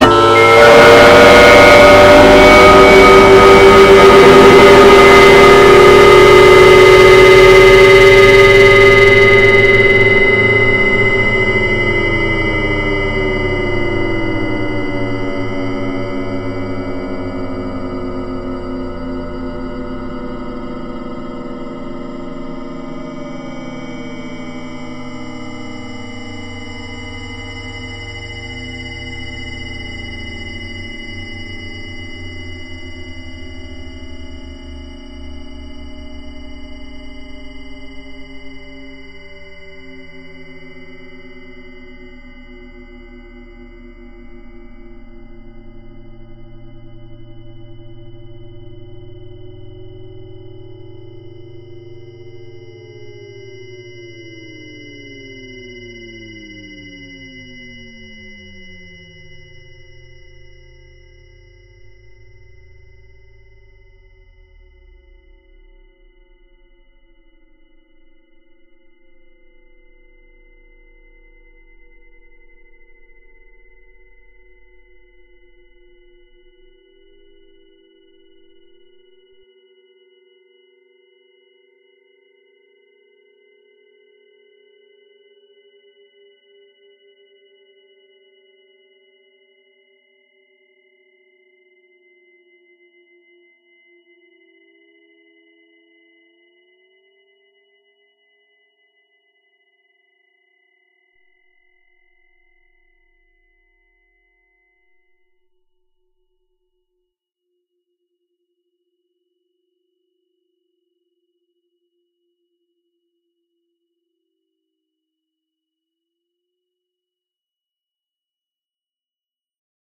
stone on stone impact7 spear
a stone sample (see the stone_on_stone sample pack) processed in SPEAR by prolonging, shifting and duplicating the partials